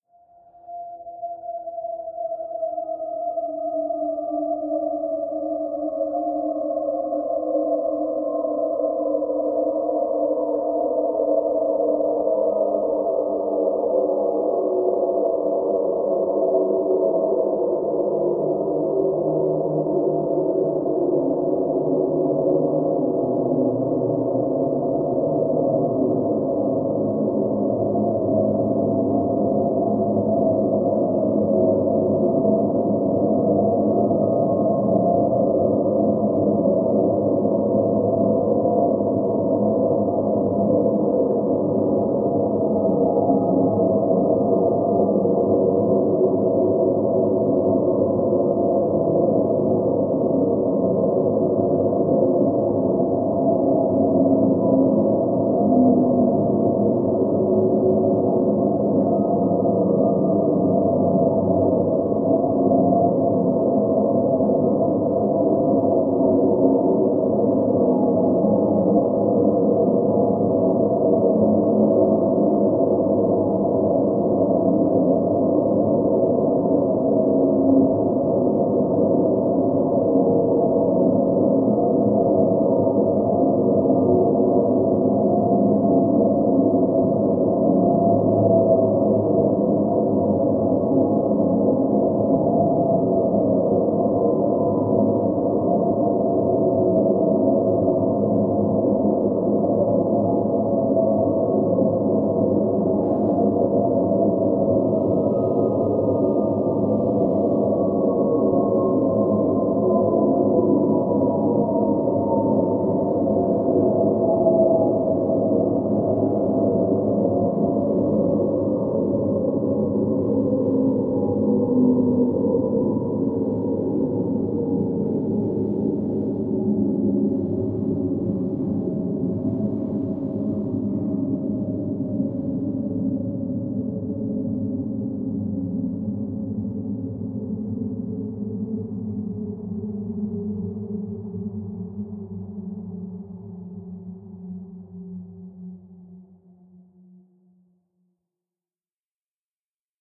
Space Sweep 01
This sample is part of the “Space Sweeps” sample pack. It is a 2:30 minutes long space sweeping sound with frequency going from high till lower. Created with the Windchimes Reaktor ensemble from the user library on the Native Instruments website. Afterwards pitch transposition & bending were applied, as well as convolution with airport sounds.